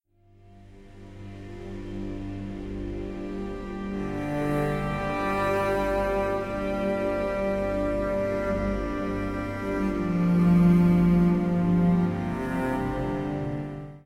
Magic Forest
audio, Clase, sonido